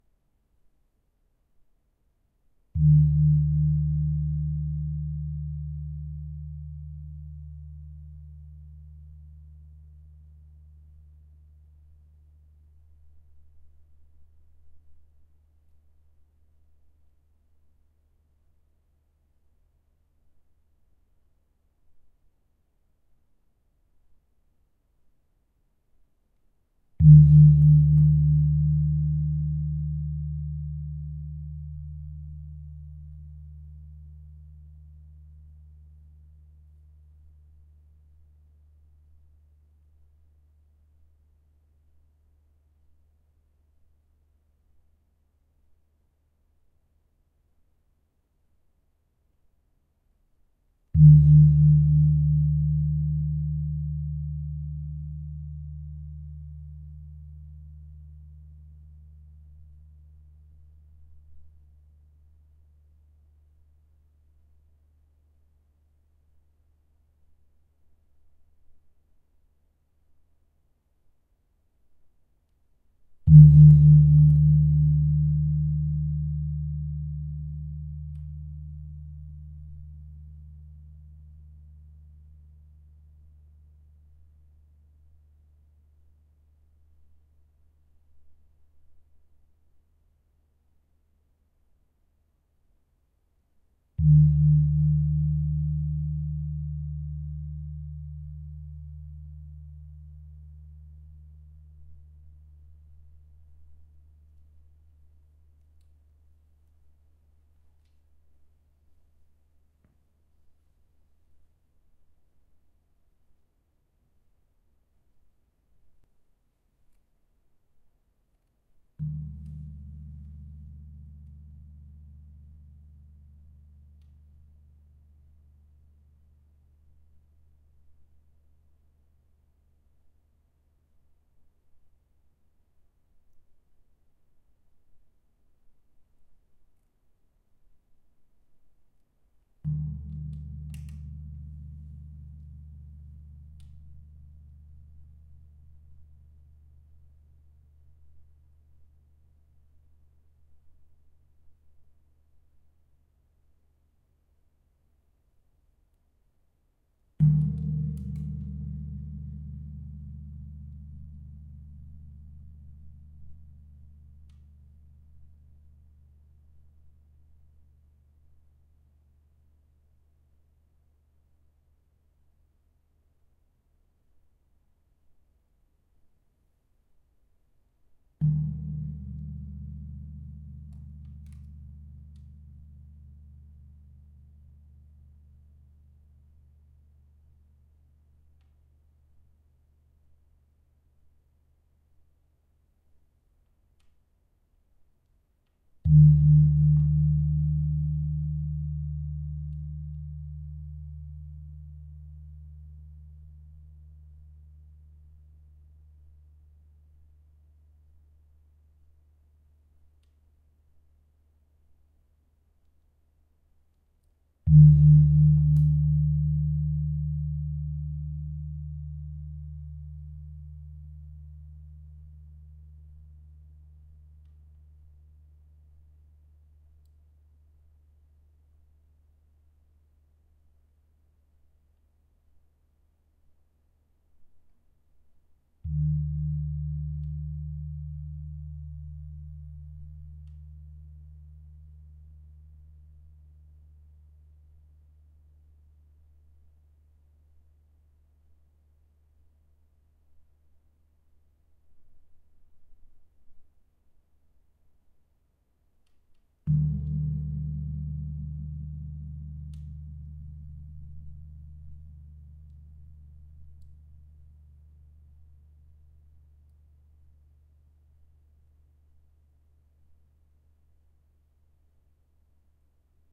kempul zoom recorder
A large kempul (gong in a Javanese gamelan).
iron, hit, kempul, metallic, copper, percussion, resonance, ring, clang, metal, steel, gamelan, gong, impact, percussive